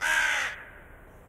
Most likely a crow (slight chance it was a raven) doing it's thing. Fadein/out applied. (Edited in Audacity)
Zoom H2n, XY mode